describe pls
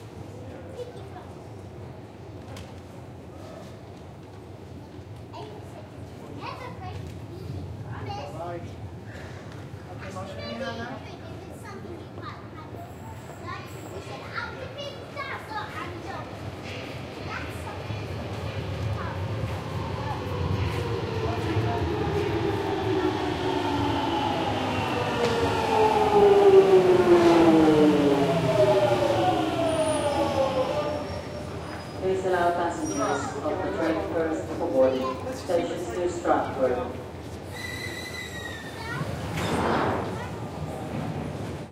A Jubilee Line train arriving at the station with the doors opening follwed by an announcement.
I understand crediting individual sounds isn't always feasible but if you are able to that would great.
If you'd like to support me please click below.
Buy Me A Coffee
Ambience, Announcement, Atmosphere, Commute, Doors, London, London-Underground, Platform, Public, Public-Transport, Station, Subway, Train, Trains, Transport, Travel, Tube, Underground